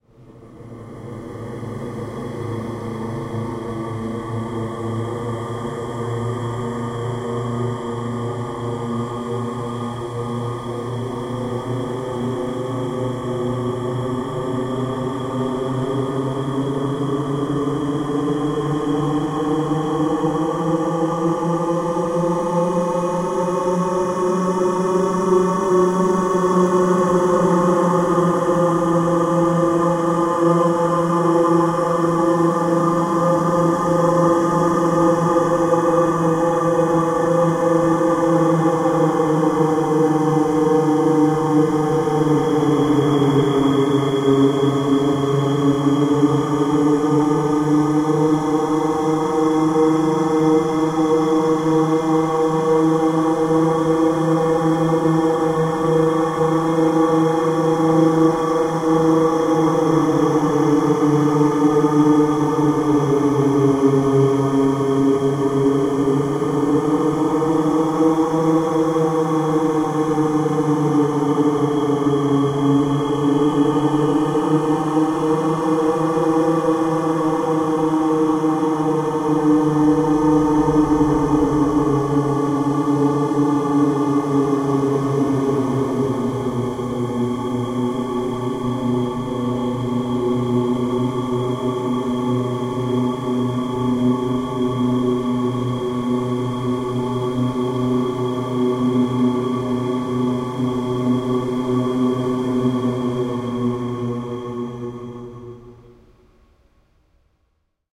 Strange Chant
My voice + paulstretch.
Recorded with Zoom H2. Edited with Audacity.
ambience, chant, cult, echo, male, mantra, om, vocal, voice, weird